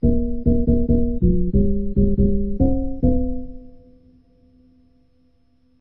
this is a marimba loop which i made (surprise, surprise) with fruity loops.